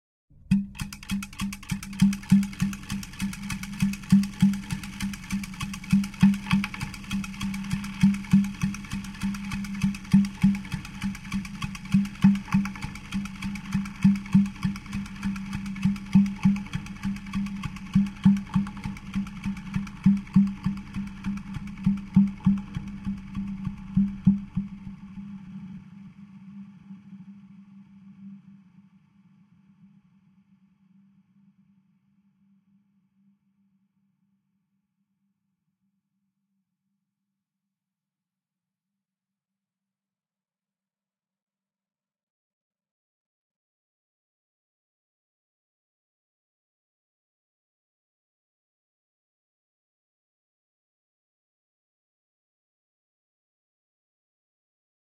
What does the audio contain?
Gear/Engranaje [Hits] (G4)
Is a sound that apparently have a gear that sounds but is more a hit of vacum
Es un sonido que parece tener un engranaje pero tambien es un poco sonido de golpe de vacio